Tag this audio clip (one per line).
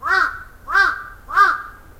bird
birds
birdsong
crow
crows
field-recording
forest